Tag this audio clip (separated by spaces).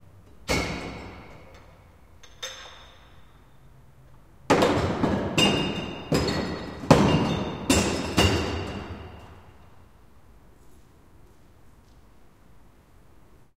courtyard hall far echo glass zoom-h2 distant